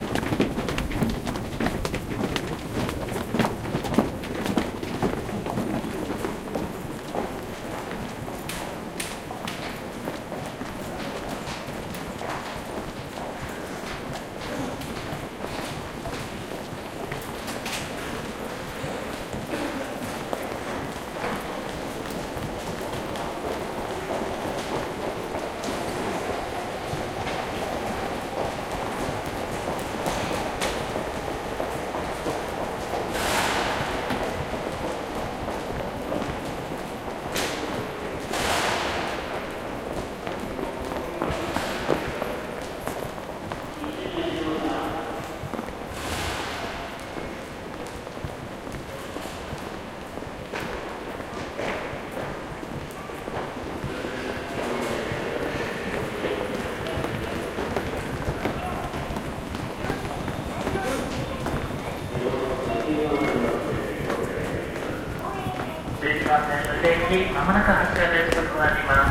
Japan Tokyo Station Footsteps Annoucements
One of the many field-recordings I made in train stations, on the platforms, and in moving trains, around Tokyo and Chiba prefectures.
October 2016. Most were made during evening or night time. Please browse this pack to listen to more recordings.
departing, field-recording, train-ride, depart, train-station, train, platform, underground, arrive, departure, subway, beeps, arriving, train-tracks